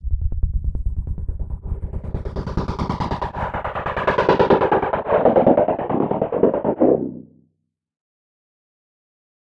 Fx 04 Rising
A rising Fx.
Trance, Fx, Rising, Psytrance, Dance, Processed